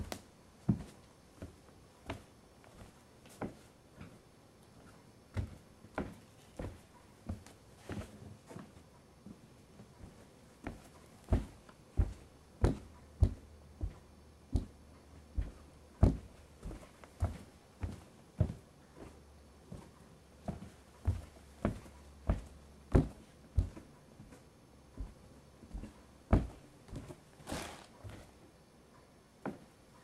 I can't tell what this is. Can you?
Walking on Wood Floor
Walking on a wood floor.